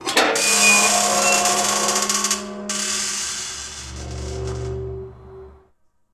opening-gate1
A mysterious, metallic gate sound.
Created from these sounds:
gate, metallic